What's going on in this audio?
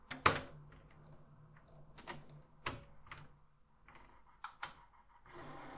15 REWIND START
Recording of a Panasonic NV-J30HQ VCR.
cassette, loop, pack, recording, retro, tape, vcr, vhs